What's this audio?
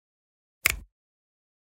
finger-snap-stereo-05
10.24.16: A natural-sounding stereo composition a snap with two hands. Part of my 'snaps' pack.
bone, brittle, click, crack, crunch, finger, fingers, fingersnap, hand, hands, natural, percussion, pop, snap, snapping, snaps, tap